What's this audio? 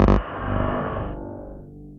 Please refer to the first sample of this sample pack for a detailed description of how the samples where generated.This sample was created by the addition of a granulator plug in (KTG Granulator) to the setup used to produce the samples.This sound has a strong attack followed by a reverberating component and sounds very artificial. Like the sound a giant sci-fi robot would produce...
digital, glitch, granular, reverberation, sci-fi